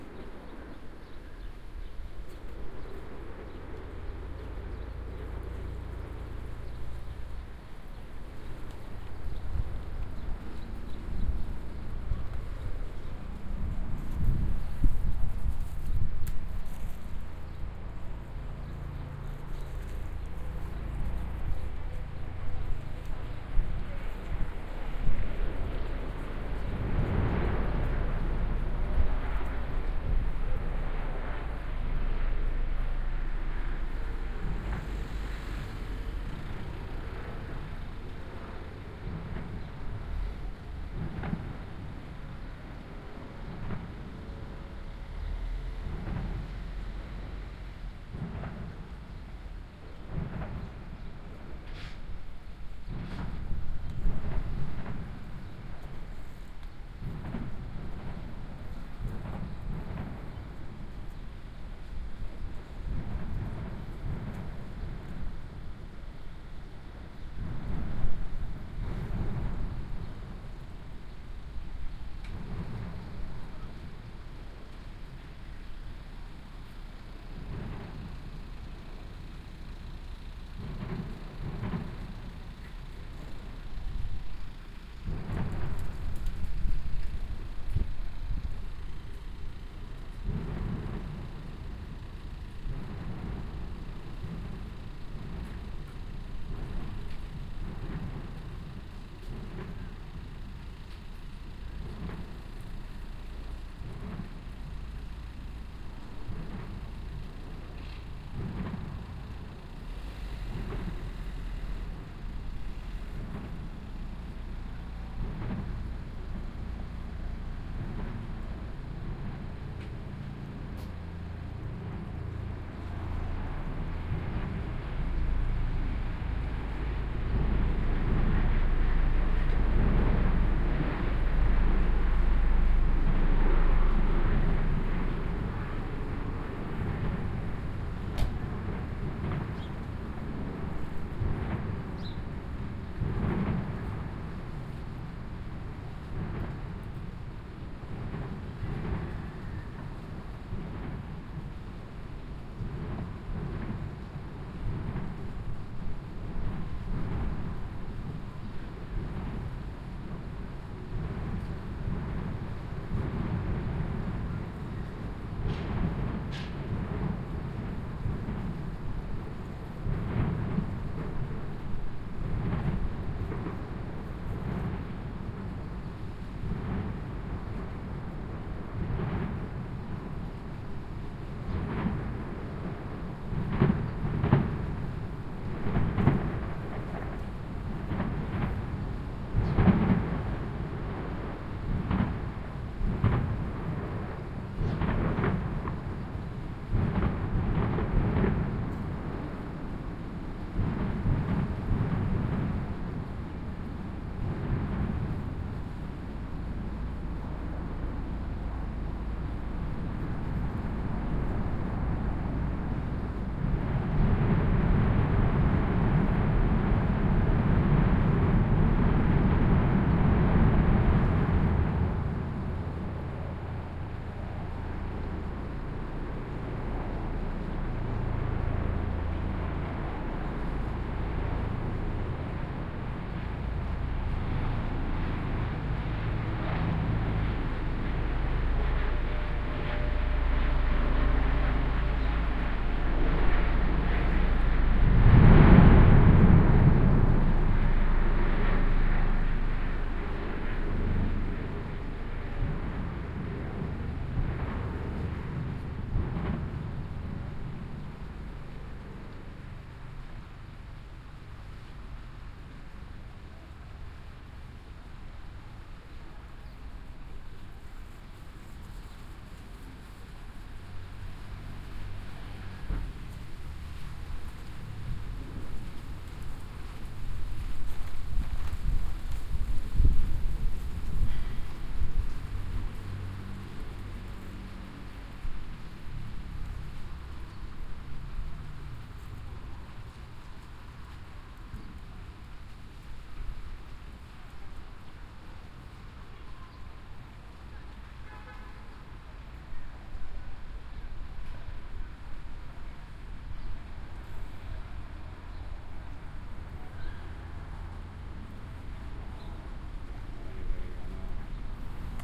Mascletà (rythmical composition of firecrackers blasts typical of Valencia) recorded from a square near the source. The surrounding buildings create a perception of distance and blur the shots.
The firecrackers blasts create a war-like ambience, with the sounds of the city mixed with the distant shots.
Recorded with head-worn binaural Soundman OKM microphones. A helicopter is can be heard half way into the recording.
recorded about 14h00 on 11-03-2013